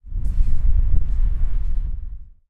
Wind Low Short
blowing, breeze, outside, wind